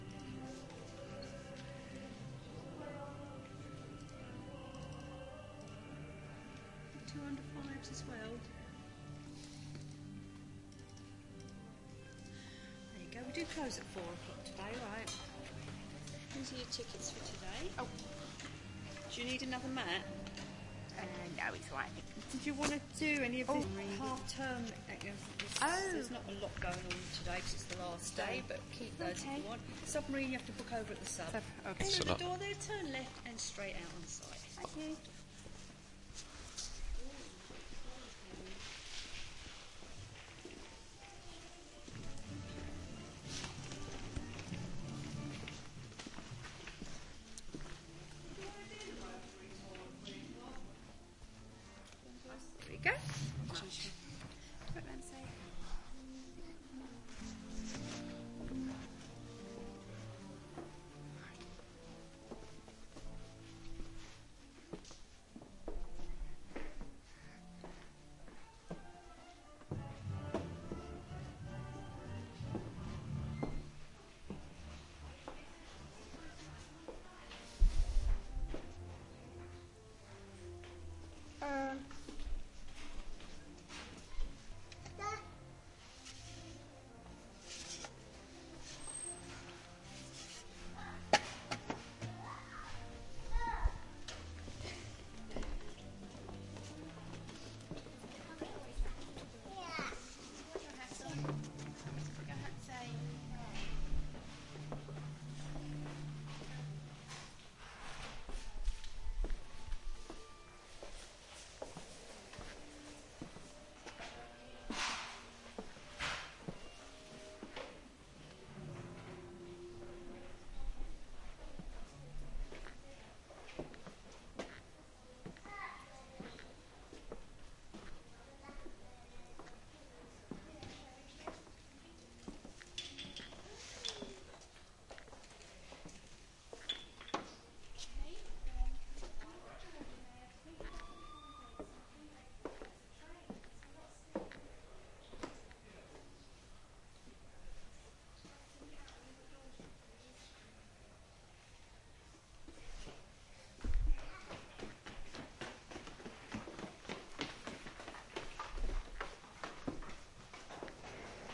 CHATHAM DOCKYARD TICKETS SHOP
Entrance to Chatham Dockyards
dockyard, tickets